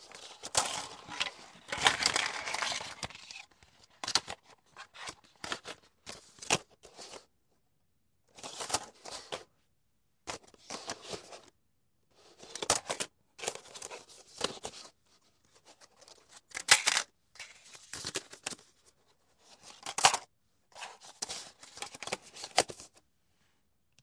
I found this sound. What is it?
Knocking disposable coffee lid stack over.